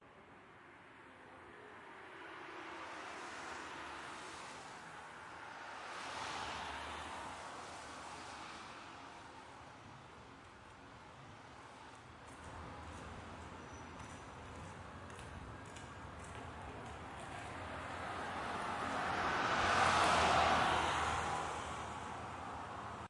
Cars shoosing
Cars in winter street
cold, freeze, ice, snow